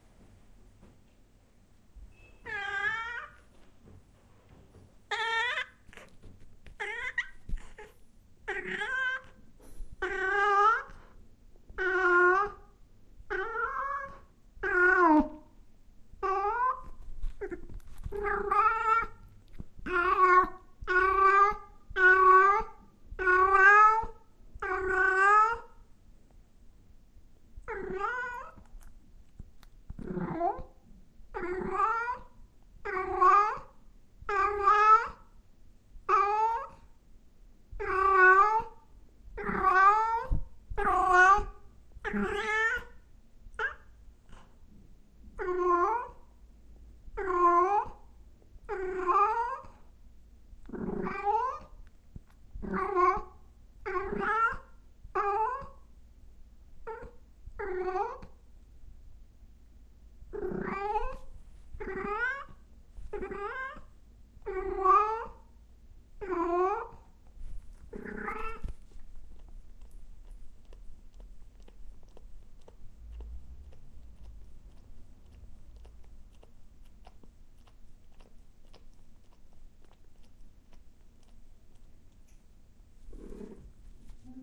my cat uses to sing at night. this is a recording of it, maybe its an important message.